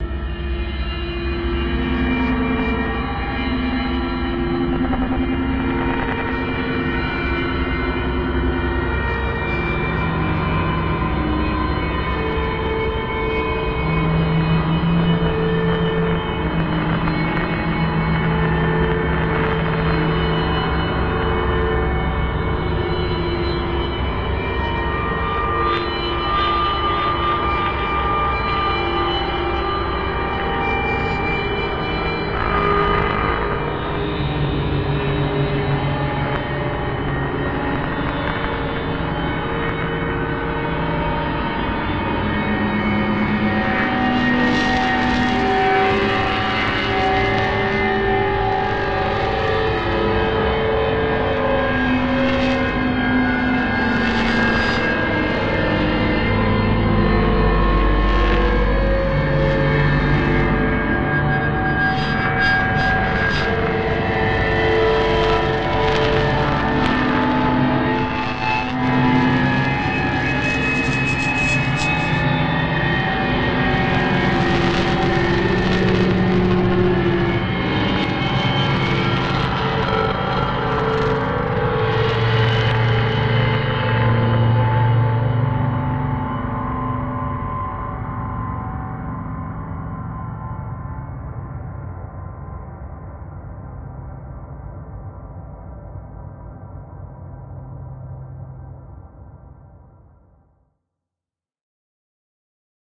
ambient sounds 14
Scary ambient sounds... almost musical, perfect for a horror scene in a movie or a game. Try morphing it further by reversing and/or time-stretching it! Fully made with a 7-string electric guitar, a Line 6 Pod x3, and delicious amounts of post-processing, sampling and VST effects :D
alien, ambience, ambient, background, creepy, dark, drone, effect, fear, film, filter, fx, game, guitar, guitareffects, horror, illbient, lovecraftian, monstrous, movie, scary, soundesign, soundtrack, spooky, suspence, suspense, terrifying, terror, texture, thrill